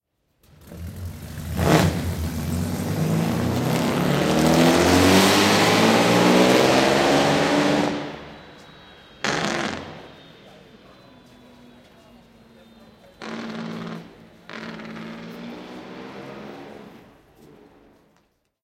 Start of the famous Mercedes W125 car on the Belgian Zolder Circuit during the Historic Grand Prix